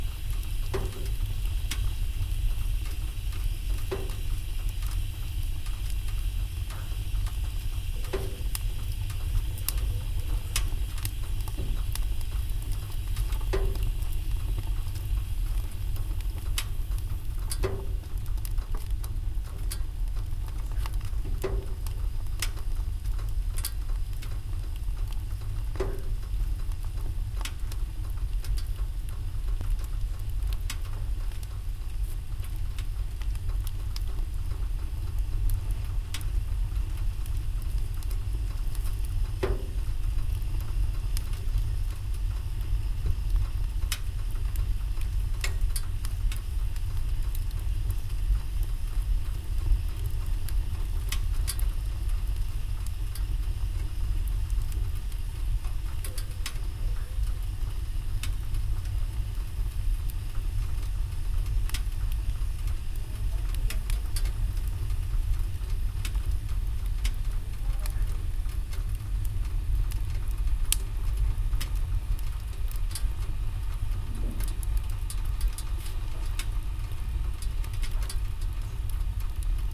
Recording of the fire crackling in fireplace in early spring mid-afternoon.
Recorded with Zoom H1
No eq or effects added. Recording levels normalized in Sound Forge Pro.
Enjoy!